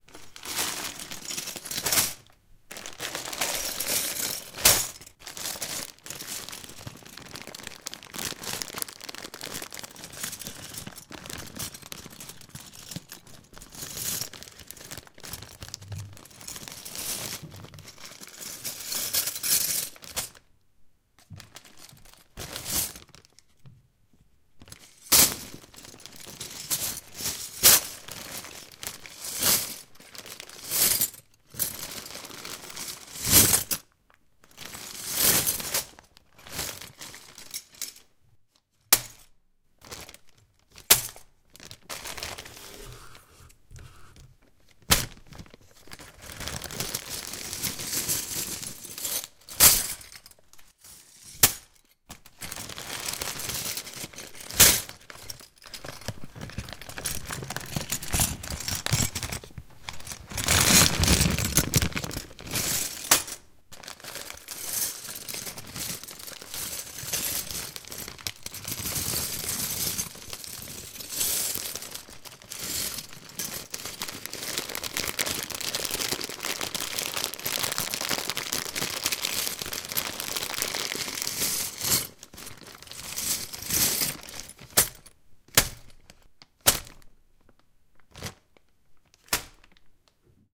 Plastic Chips and Packaging
Playing around with a bag of 3d puzzle chips in its original packaging.
Recorded with a Zoom H2. Edited with Audacity.
Plaintext:
HTML: